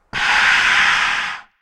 An alien or monster yell. This could be used for a sci-fi film or game.
alien, yell